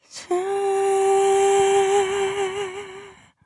separate female vocal notes